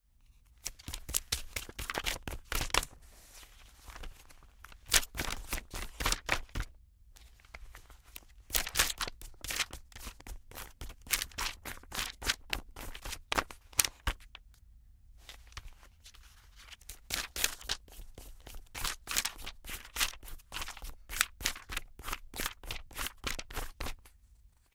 Magazine Glossy Page Flip Fast 01
Fast, Flip, Glossy, Magazine, Page, Paper, foley, handling